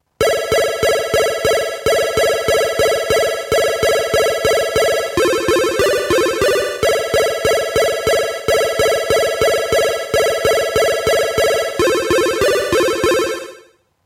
Atari 1040ste YM Arpeggio (145 bpm) to use in some track maybe?